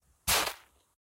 Shovel - Sand/Gravel/Snow
shovel, dig, shoveling, snow